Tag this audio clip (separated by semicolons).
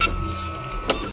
ambient; effect